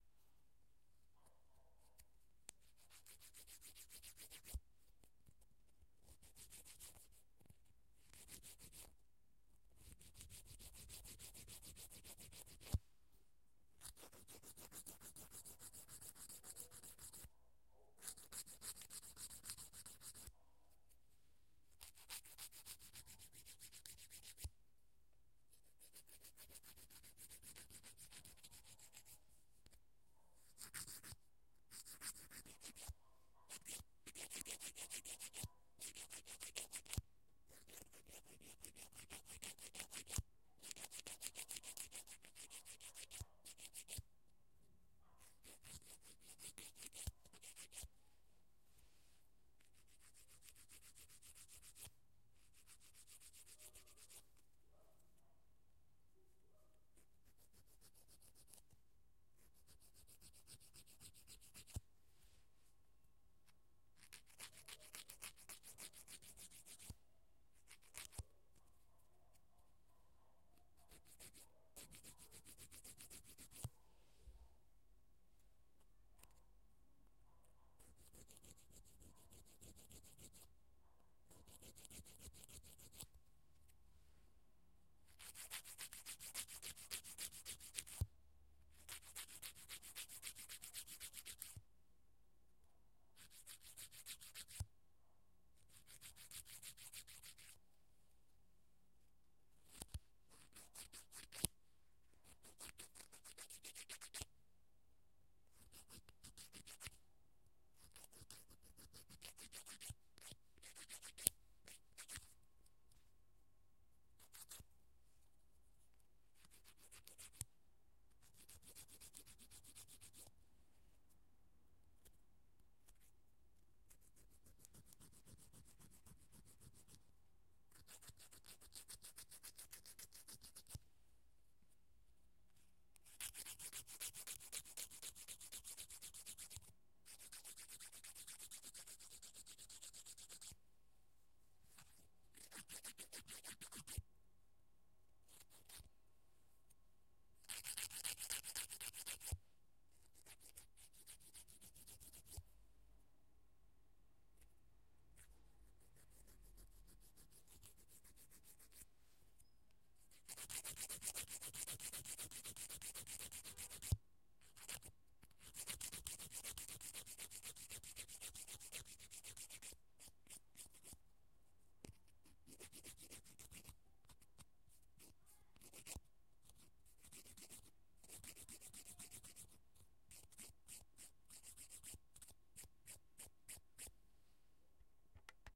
LIXANDO UNHA
gravador condensador/ fonte do som: pessoa no quarto lixando a unha.
unha, lixa, lixando